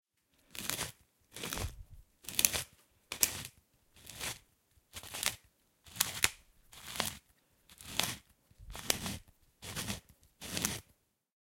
PEPPER MILL - 1
Sound of a pepper mill. Sound recorded with a ZOOM H4N Pro.
Son d’un moulin à poivre. Son enregistré avec un ZOOM H4N Pro.
pepper, seasoning, cook, ginger, kitchen